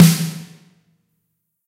Sd DWEdgeFat
A single hit of a 7 x 14" DW Edge snare drum. Recorded with a Beyerdynamic M201 mic. Sounds even better if you pitch shift it lower!